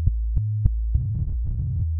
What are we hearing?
I took some waveform images and ran them through an image synth with the same 432k interval frequency range at various pitches and tempos.